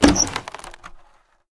Breaking a single wooden barrel.